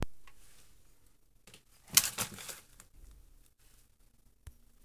dropping submachinegun on sling
The sound of letting go of a (fake) H&K MP5 gun on a sling which comes to rest on the holder's body.
gun, drop, sling